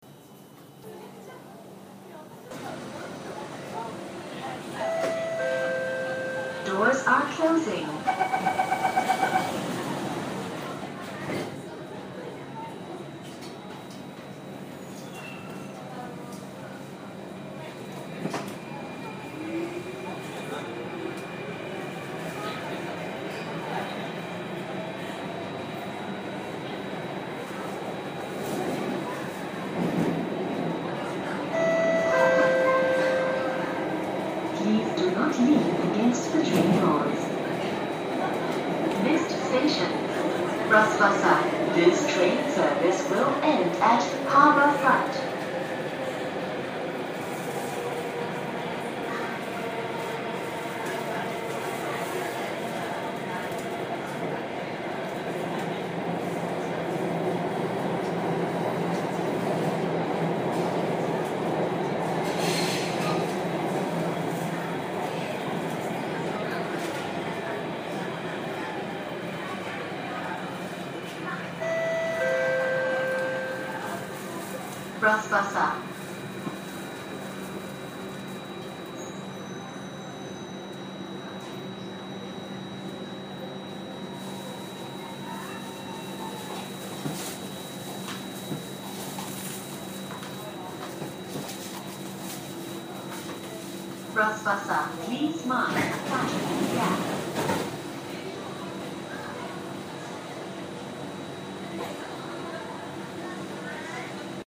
Singapore MRT In Train
Singapore MRT iPhone.